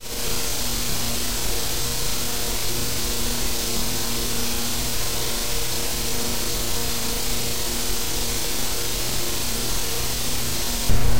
small hi-speed electric fan
The sound was processed by lowering higher frequencies
using a multi-band equalizer in the Audacity program.
Then I used the compression module to make the sound a
little cleaner. The fan was set on low to get less bass
distortion.